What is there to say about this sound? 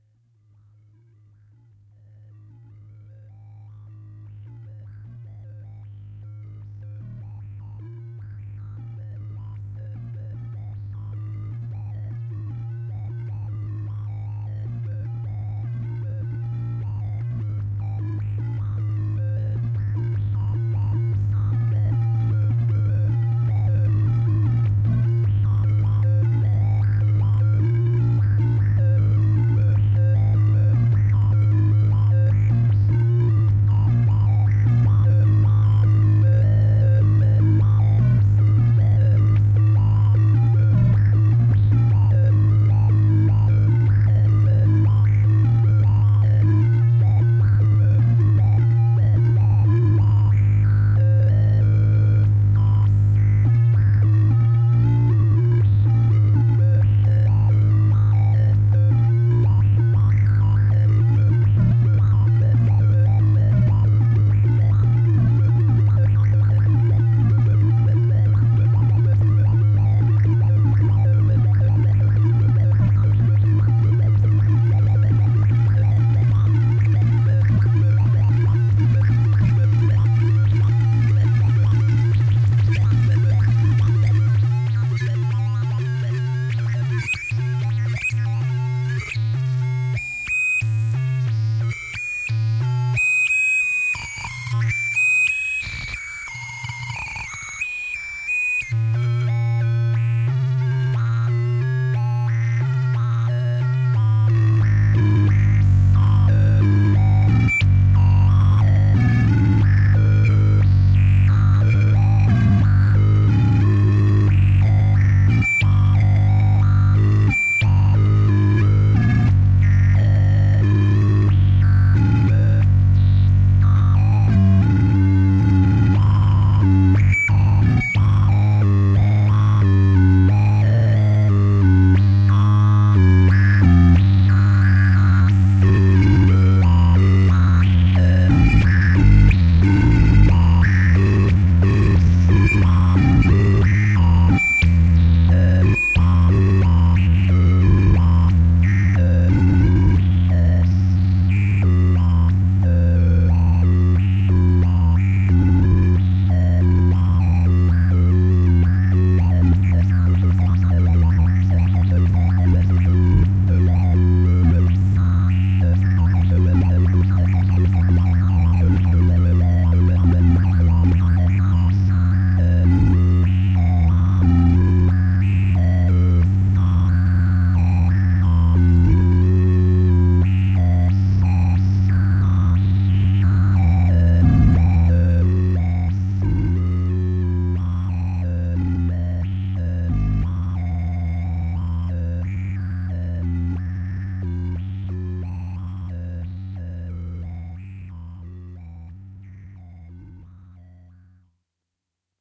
Feedback loop made by running aux send out to input and adjusting EQ, pan, trim and gain knobs. Added in another stereo input from zoom bass processor.